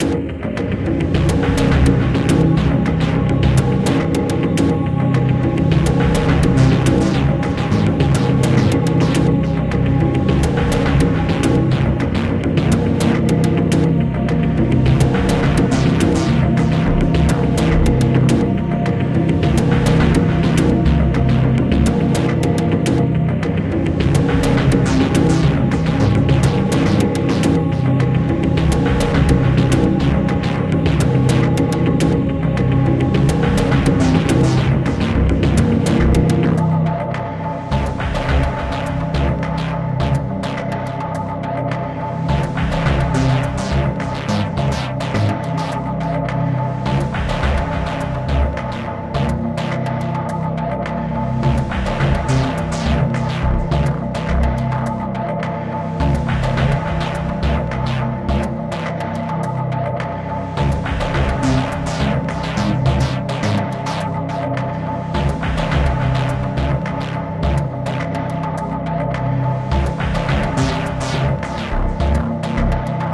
action game music by kk
sinister, suspense, dramatic, terrifying, thrill, background-sound, anxious